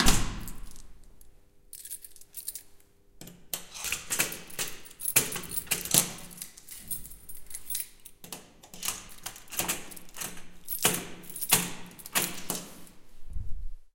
The sound of closing door in a floor hall, then locking with keys.
Recorded with Zoom H4n